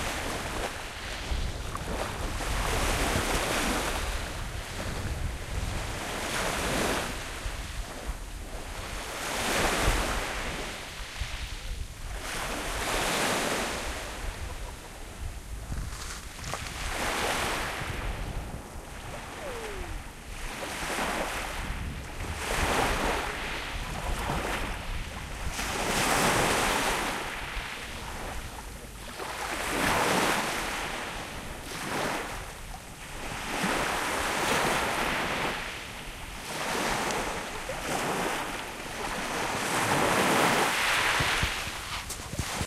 Waves recorded at Alkai beach, Seattle WA
environmental-sounds-research, atmosphere, seattle, water, space, sea